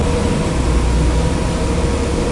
Recorded during a 12 hour work day. Noise cancel mode activated.... whatever that means.